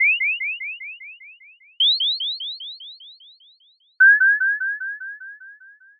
Không Gian 1
• File→New
• Create→Add New→Mono track (left)
• Generate→Chirp...
- Waveform: Sine
- Start
Frequency: 2000
Amplitude: 0.5
- End
Frequency: 3000
Amplitude: 0.5
- Interpolation: Linear
- Duration 00h 00m 00.200s
• Effect→Fade In (from 0.000s to 0.010s)
• Effect→Fade Out (from 0.100s to 0.200s)
• At 0.200s
- Generate→Silence…
Duration: 00h 00m 03.000s
• Effect→Echo
Delay time: 0.2
Decay factor: 0.6
• Create→Add New→Mono track (right)
• Generate→Chirp... (at 1.800s)
- Waveform: Sine
- Start
Frequency: 3000
Amplitude: 0.5
- End
Frequency: 4500
Amplitude: 0.5
- Interpolation: Linear
- Duration 00h 00m 00.200s
• Effect→Fade In (from 1.800s to 1.810s)
• Effect→Fade Out (from 1.900s to 2.000s)
• At 0.200s
- Generate→Silence…
Duration: 00h 00m 05.000s
• Effect→Echo
Delay time: 0.2
Decay factor: 0.6
• Create→Add New→Mono track
• Generate→Chirp... (at 4.000s)
- Waveform: Sine
- Start
Frequency: 1500
Amplitude: 0.5
- End
Frequency: 1750
Amplitude: 0.5
- Interpolation: Linear